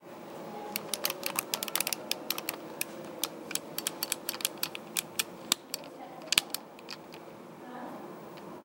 Sounds of Atari joystick.